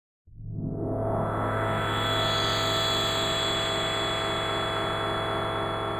anxiety sound-1-Tanya v
bad dream sound